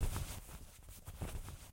Clothes Rustling 1
Rustling some clothes near a Zoom Q4.